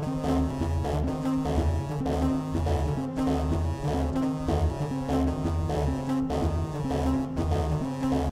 sax realtime edited with max/msp
sax-riff, loop, sax, quarrelling, ducks, edited, dark